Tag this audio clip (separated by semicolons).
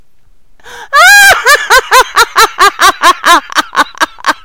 laughing; laughter; laugh; woman; female; giggle